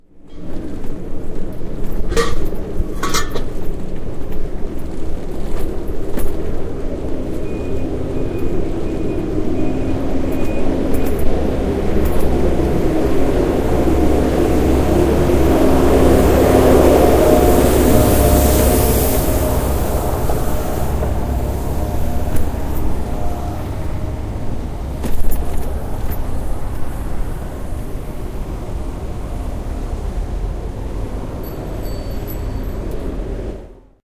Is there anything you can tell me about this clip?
Riding home on my bike I meet some road sweepers one is removing an obstacle to give way to his co worker in a road sweepers truck. You can also hear my bike bouncing on the bumpy street. Recorded with an Edirol R-09 in the inside pocket of my jacket.
engine, field-recording, nature, street, street-noise, traffic